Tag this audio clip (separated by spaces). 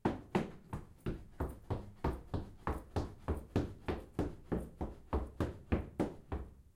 Footsteps running wood